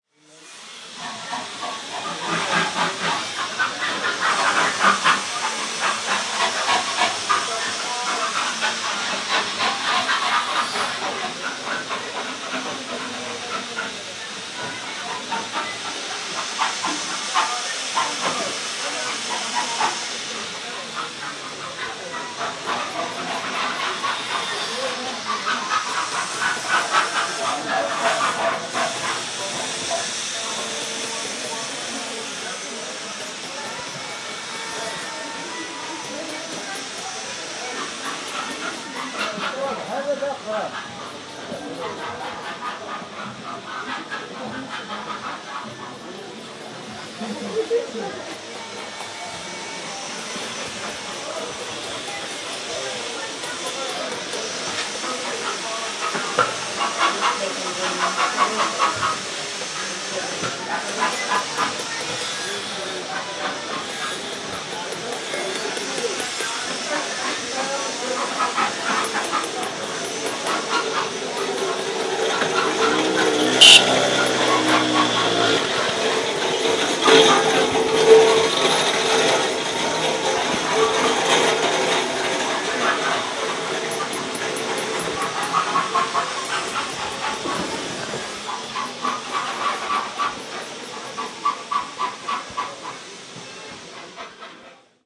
labormix medina marrakesh
This recording was made in Medina, Marrakesh in February 2014.
Labormix, Marrakesh, Medina, motorcycle, rattle, ticking